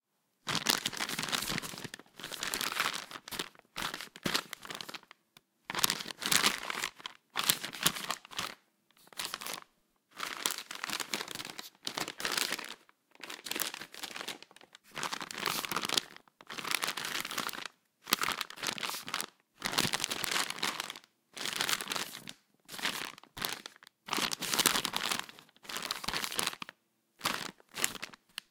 Newspaper / Paper Bag MAnipulation
Hand manipulation, thick paper bag.